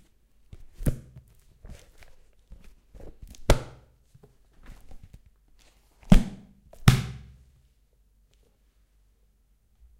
sound of a large book being closed. recorded with Rode NT4 mic->Fel preamplifier->IRiver IHP120 (line-in)/ sonido pgrave producido cerrando bruscamente un libro